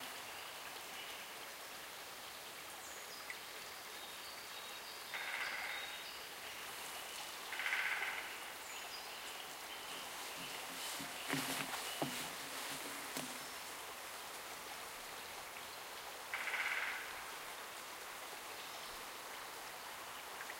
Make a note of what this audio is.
Forest Ambience
Recorded in Mátrafüred (Hungary) forest with a Zoom H1.
forest, nature, naturesound, ambience, sound